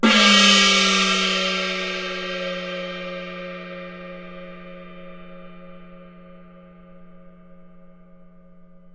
Recording of a single stroke played on the instrument Daluo, a gong used in Beijing Opera percussion ensembles. Played by Ying Wan of the London Jing Kun Opera Association. Recorded by Mi Tian at the Centre for Digital Music, Queen Mary University of London, UK in September 2013 using an AKG C414 microphone under studio conditions. This example is a part of the "daluo" class of the training dataset used in [1].
chinese-traditional, gong, idiophone, china, chinese, icassp2014-dataset, compmusic, qmul, percussion, daluo-instrument, beijing-opera, peking-opera